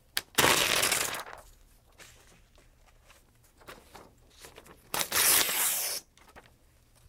Ripping a piece of paper/rasgando um pedaço de papel
papel, ripping, rasgar, paper, rasgando